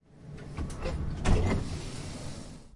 13 Door open - int
Tram, CZ, Door-open